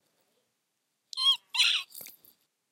spider squeek
My voice recorded to sound like a small animal squeaking. Recorded with audacity v2.0.3 with my iPhone headphones in a small room.
eek, mouse, small-animal, spider, squeak, squeek